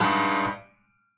Piano, Metal Mallet, Low Cluster, A
Raw audio created by striking multiple low piano strings with a metal mallet and quickly damping them by lowering the sustain pedal for a brief cluster effect.
I've uploaded this as a free sample for you to use, but do please also check out the full library I created.
An example of how you might credit is by putting this in the description/credits:
The sound was recorded using a "H1 Zoom recorder" on 8th June 2017.
Low, Cluster, Piano